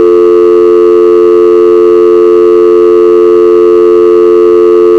Current (PTP) US loopable dialtone